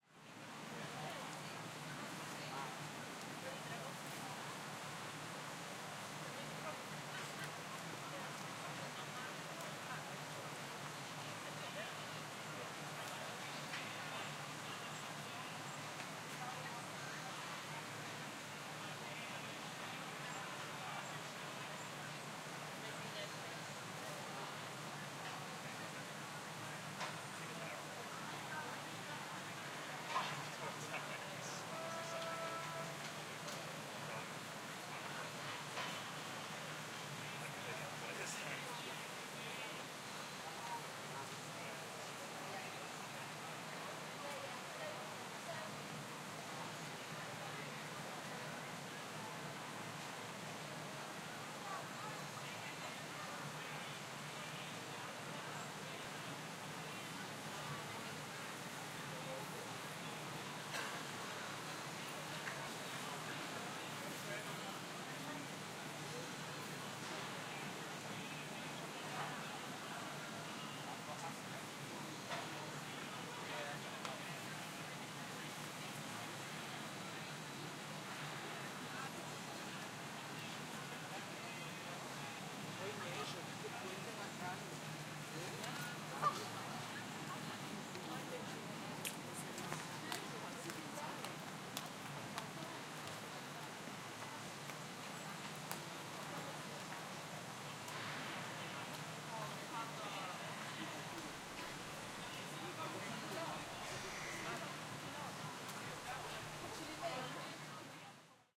A field recording of Camden Lock, London, England. Recorded with a Zoom H6 and cleaning up in post with Izotope RX.
ambience, Camden, city, field-recording, lock, London, river, urban